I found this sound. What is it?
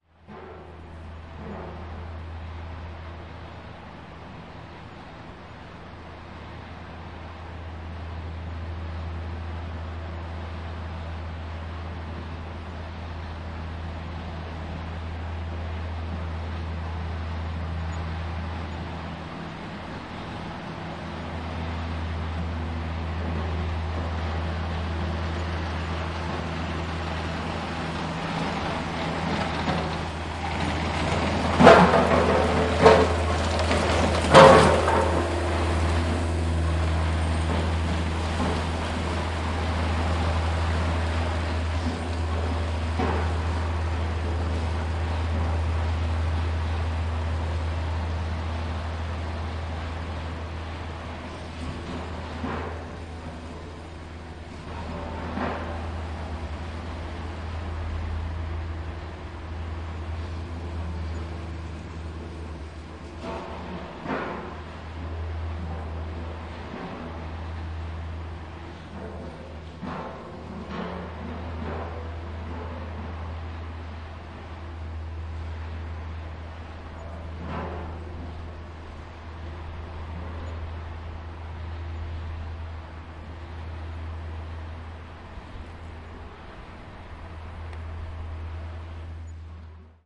Some machines on a street construction site.